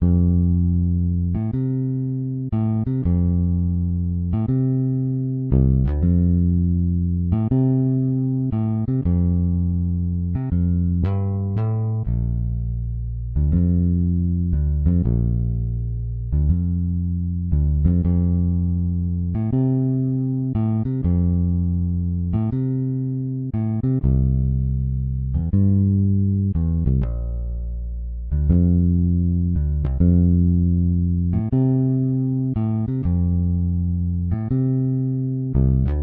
Song5 BASS Fa 3:4 120bpms
Fa, Chord, 120, blues, HearHear, beat, loop, bpm